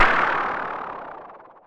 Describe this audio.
Here's a heap of snapshot samples of the Synare 3, a vintage analog drum synth circa 1980. They were recorded through an Avalon U5 and mackie mixer, and are completely dry. Theres percussion and alot of synth type sounds.
Synare, analog, drum-synth, percussion, vintage